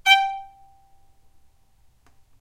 violin spiccato G4
spiccato, violin